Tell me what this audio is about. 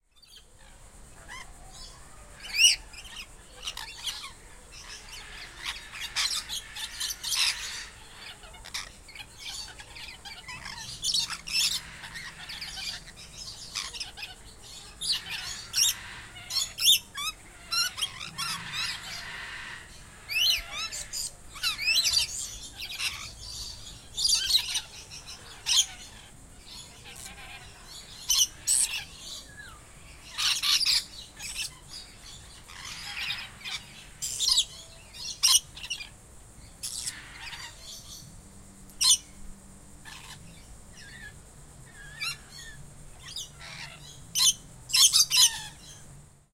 Lorikeet Parrot Calls, Ensemble, A
Raw audio from within the 'Lorikeet' enclosure at Jacksonville Zoo in Florida. You can also hear deep squawks from another enclosure in the distance.
An example of how you might credit is by putting this in the description/credits:
The sound was recorded using a "H1 Zoom recorder" on 22nd August 2017.
bird, parrot, zoo, group, birds, lorikeet, ensemble, calls, calling, call